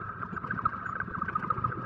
Underwater Sub Fantasy sound
Could be Useful for an underwater scene involving a submarine of some kind,
Sci fi uses also
submarine, radar